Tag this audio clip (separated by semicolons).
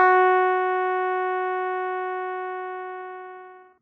synthesised; multisampled; keyboard; digital